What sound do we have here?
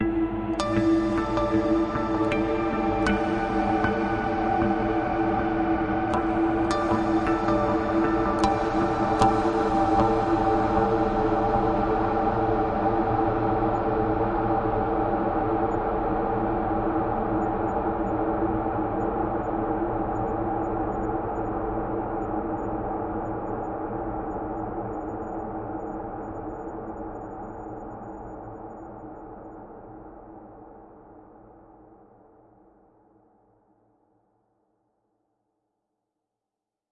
Acoustic Ambient Guitar - 78Bpm - 5
Deep and evolving acoustic guitar sample.
Gear used:
Maquina del tiempo Mdt6 Delay - Dedalo (Argentinian pedals)
Modulo Lunar Phaser - Dedalo
Hummingverb Reverb - MBS efectos (Argentinian Pedals)
Mr Smith Delay - MBS efectos
Ibanez electroacustic PF17ECE
Apogee Duet 2
Ableton Live
Frontier Self adaptive Limiter - D16 Group Audio Software
ValhallaShimmer
Like it ?
w3ird0-d4pth
drone, space, dreamy